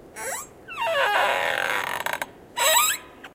chair squeak
made by moving the seat of a chair